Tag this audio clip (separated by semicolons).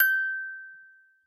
clean; sample; toy; metal; musicbox